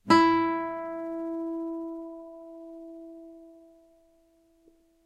open e string on a nylon strung guitar.